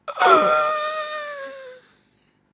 Good quality zombie's sound.
breath, moan, undead, zombie